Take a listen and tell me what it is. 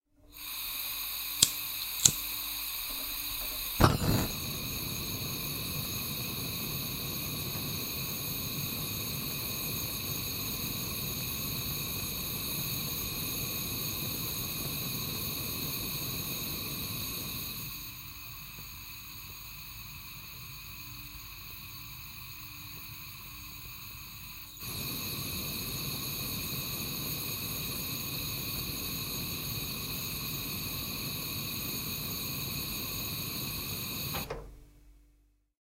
gas cooker
cooker, cooking, gas, kitchen